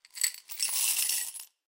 COINS IN A GLASS 22
Icelandic kronas being dropped into a glass